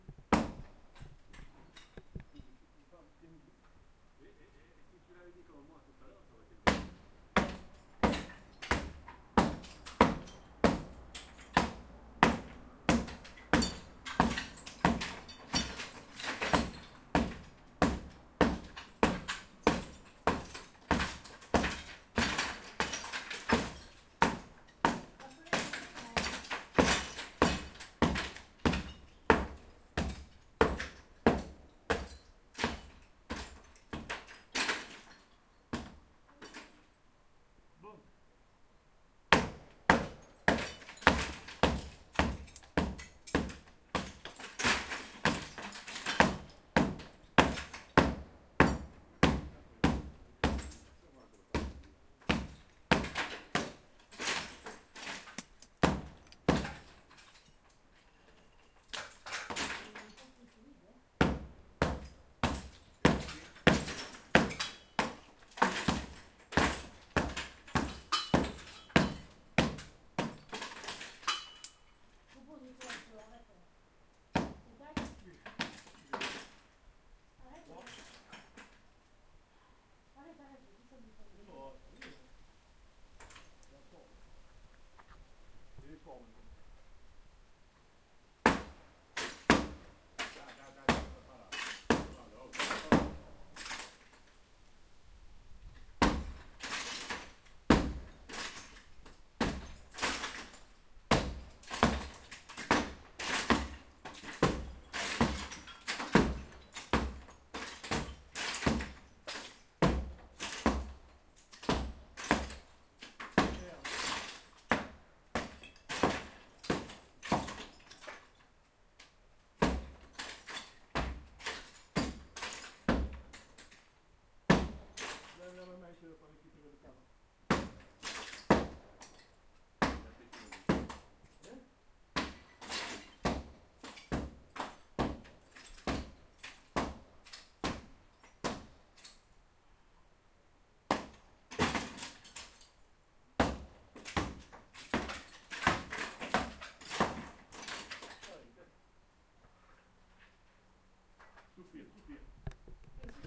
masse demolition gp
man hitting a wall with a sledge wave stereo ,48kHz ,16bits,recorded with H4N zoom with internal mics and home made softy
field, outside, recording